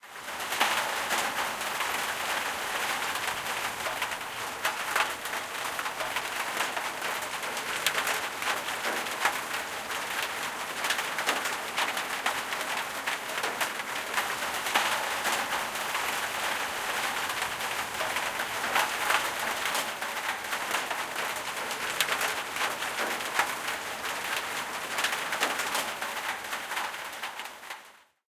Rain On a Tin Roof
My front veranda roof is tin and it was raining so I stood under it holding a Rode NT4 mic in close proximity to the underside of the roof. Filtering this sound will change the perceived roof type. This is a stereo recording. The NT4 was connected to an Edirol R-09 mic-in (15 level setting).
field-recording, metal, rain, stereo, tin-roof